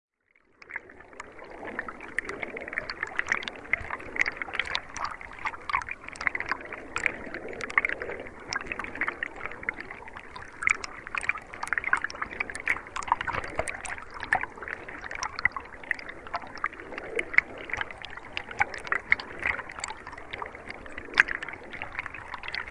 river hydrophone
A short recording of riverflow using a homemade hydrophone, made by a friend.
river, water